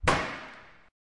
sound 9 - locker closing
Sound of a locker's door closing.
Taken with a Zoom H recorder, near the door.
Taken nexto the cafeteria.
campus-upf, close, coin, door, key, lock, locker, UPF-CS14